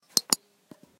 A non edited click sound effect
click, clicking, clicks, mouse, typing, keyboard, computer